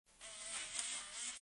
Robot part Moving sound 01
Part of a robot moving and connecting one to another.
Thank you for the effort.
part, future, connect